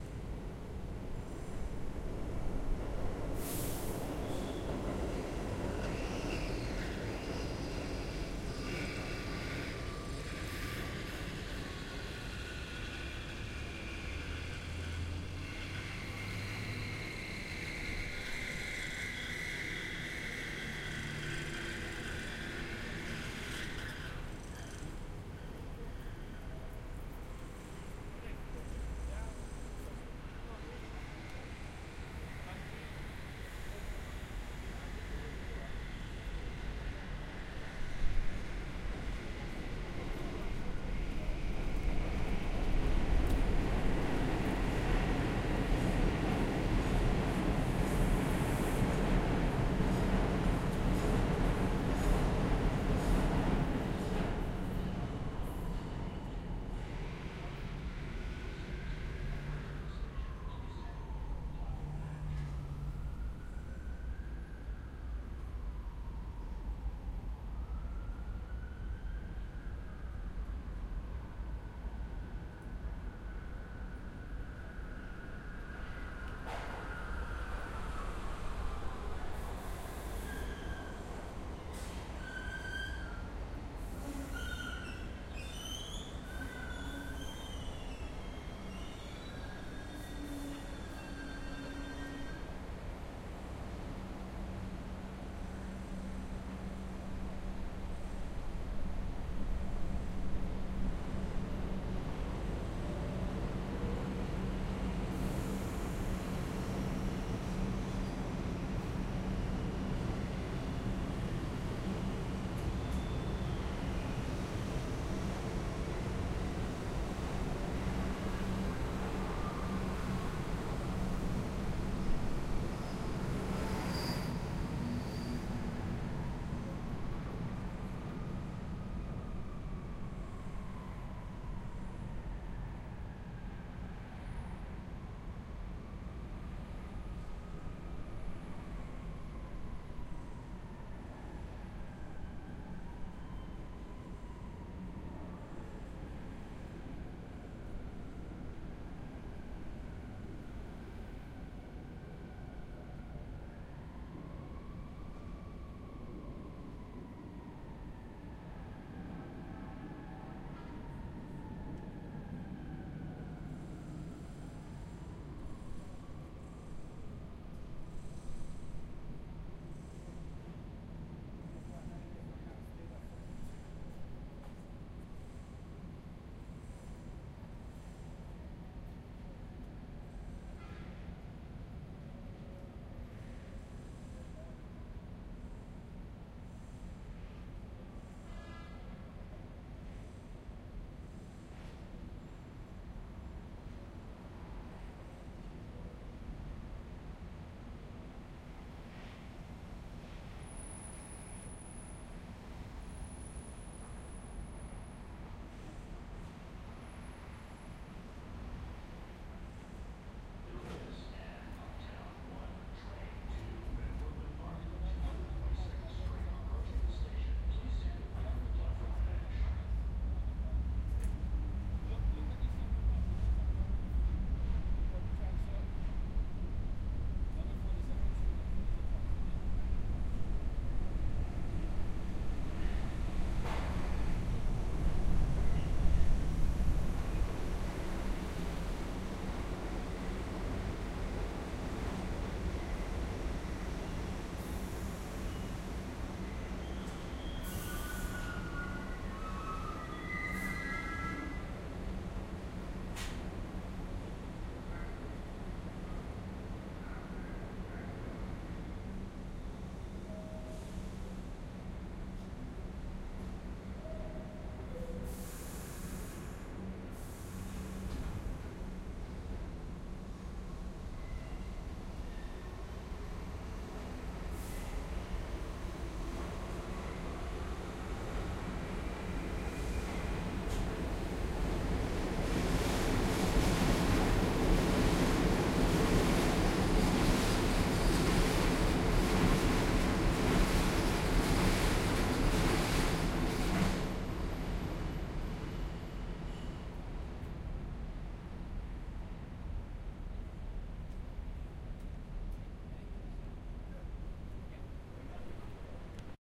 NYC street Franklin and W. Broadway subway sounds from street grate
Field recording standing over the grate above a subway, at W. Broadway & Franklin Street in Manhattan.
field-recording, New-York-City, NYC, street, subway, traffic